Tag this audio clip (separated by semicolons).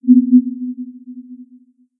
inspection; film; ping; communication; horror; titanic; ship; undersea; signal; sonar; game; underwater; sea; mapping